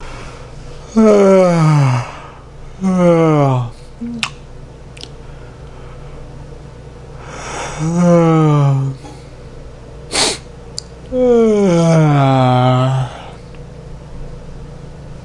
male yawning
my roommate yawning